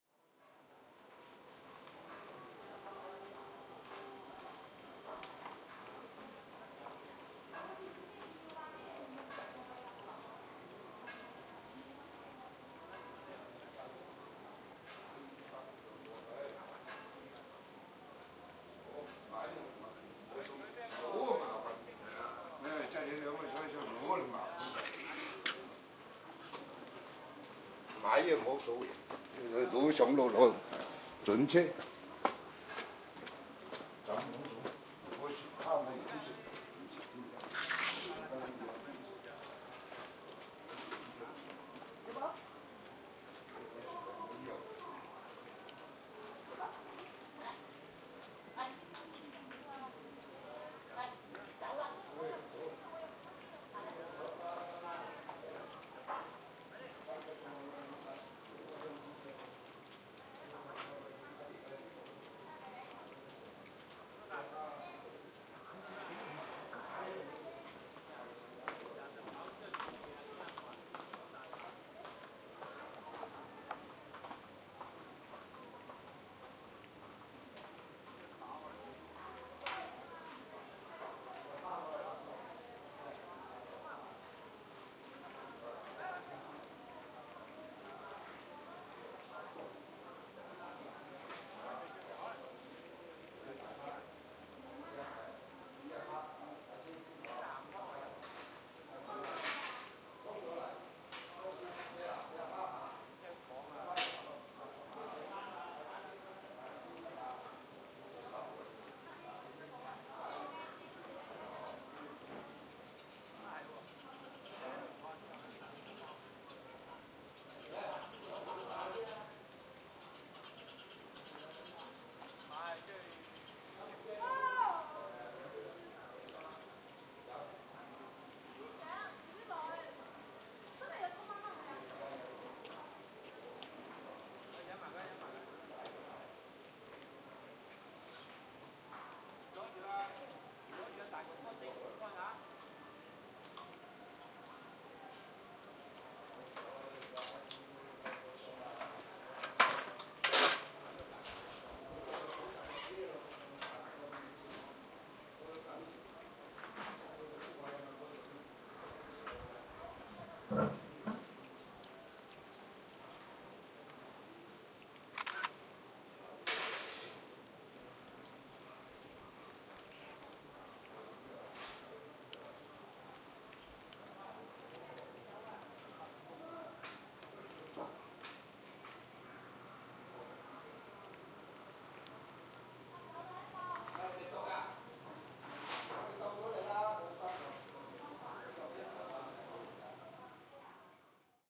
Stereo recording of a dog bark in Tai O, Hong Kong. Tai O is a small fishing village. It is famous for a very special life style, people living in some huts that built over a small river, just a little like in Venice. Recorded on an iPod Touch 2nd generation using Retro Recorder with Alesis ProTrack.